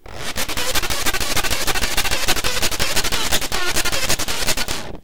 fpphone-rollclose
Toy pull-along phone moving along the floor, with the microphone attached, making a strange mechanical squawking noise.